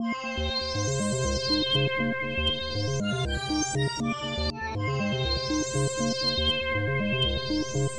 string sequence that sounds like it's from a science program. Arp style sound